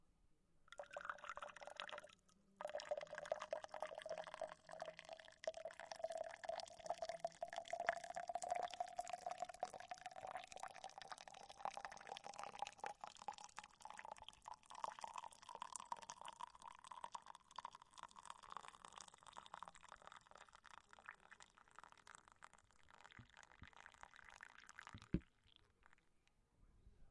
Virtiendo el agua caliente en un termo para el té
This is part of a series of workshops done in collaboration with Casa Asia, that attempt to explore how immigrant communities in Barcelona would represent themselves through sound. Participants are provided with recorders that they can take with themselves and use daily, during a period of time.
In the workshop we reflect collectively on the relation between the recorded sounds, and their cultural significance for the participants. Attempting to not depart from any preconceived idea of the participant's cultural identity.
Sound recorded by Mary Esther Cordero.
"Es el sonido del agua, ya hervida, mientras lo vierto en un termo para preparar el té. La grabación se hizo en mi casa. El motivo por el que escogí este sonido es que cada día, tanto antes de ir a trabajar o simplemente salir, me llevo siempre un termo con mi té verde o infusión, y forma parte de mi vida rutinaria."
infusi, agua, termo, intercultural, water, tea, Barcelona, rutina, casa, filipino-community, n, hot, casa-asia